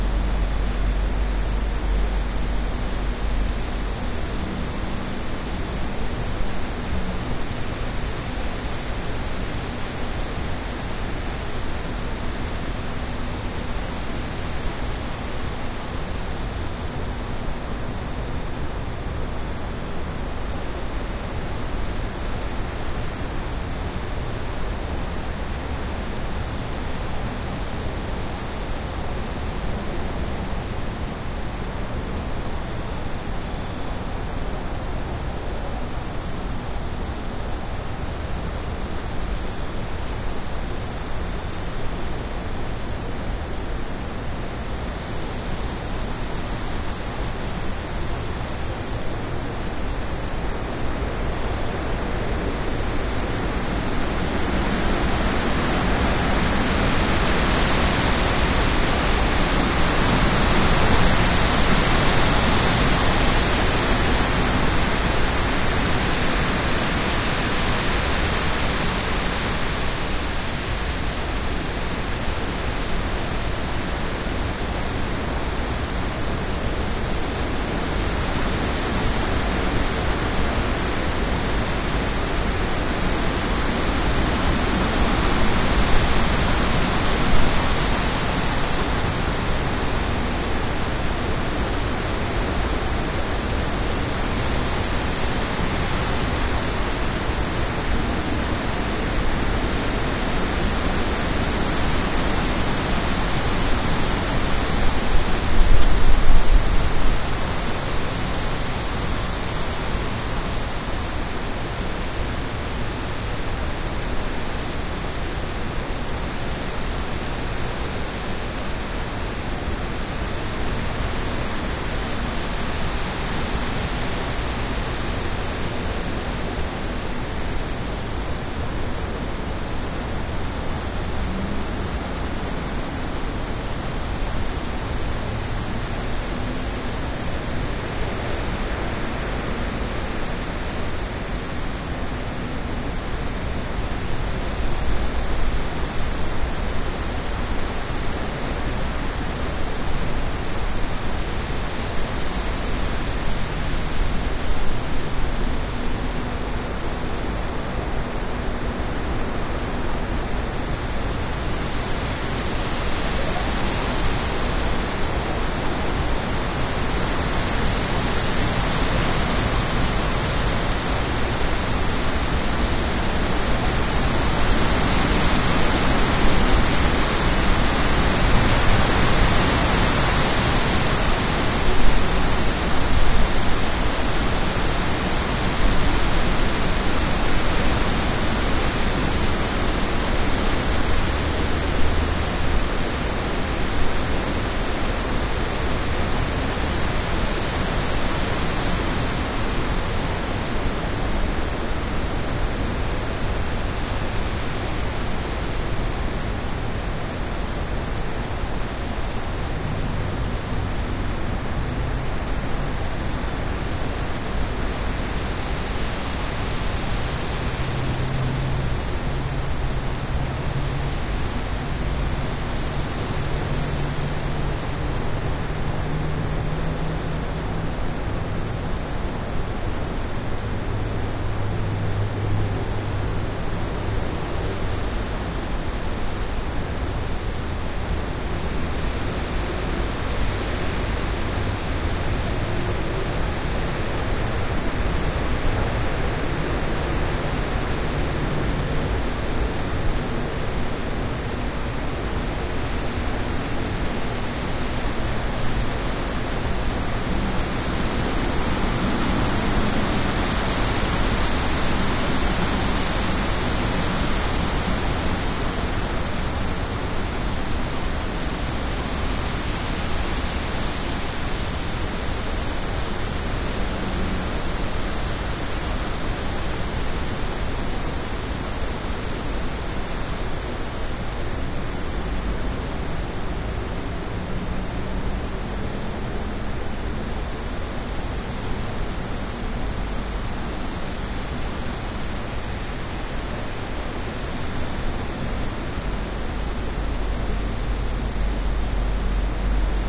This is a recording of a strong wind from my backyard in Long Island. I used a dead cat wind shield which did the trick for most of it, and an Edirol R-09HR. I normalized it, removed my start/stop movements, then looped it seamlessly.